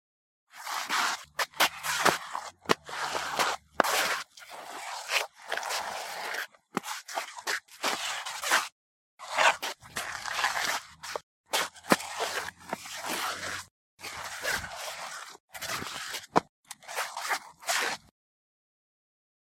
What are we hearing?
189230 starvolt shuffling-3-front (EDITED LOUD VERSION)
shuffling, foot-scrapes, concrete-scrapes, shoe-scuffs